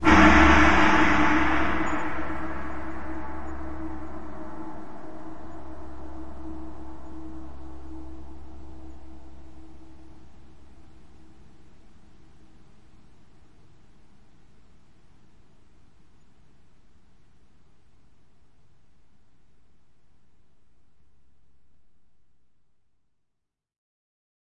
Gong sabi 1
Sabian gong chinese percrussion beijing beijing-opera CompMusic
beijing, beijing-opera, chinese, CompMusic, gong, percrussion, Sabian